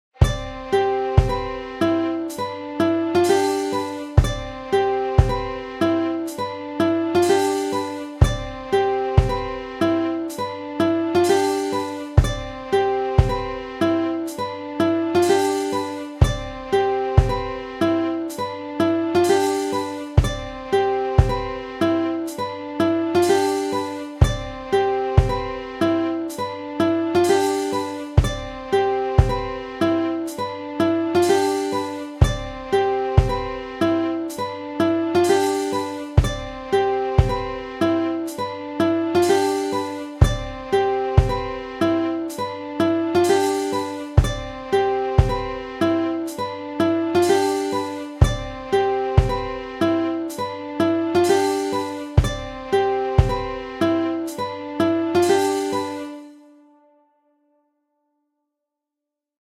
Calm cinematic background. Made on Garage Band.